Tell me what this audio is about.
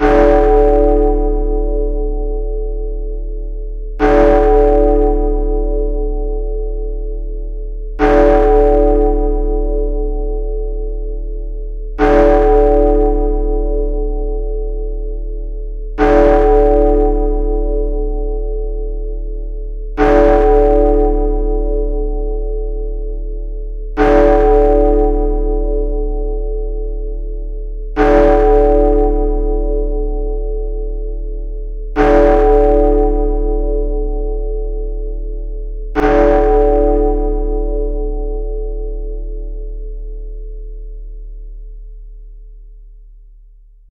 Tollbell - 10 strikes
Large bell with 10 strikes. 4 seconds between strikes; long tail.
10-bell-strikes, big-ben, large-bell, multiple-bell-strikes, ten-oclock, tollbell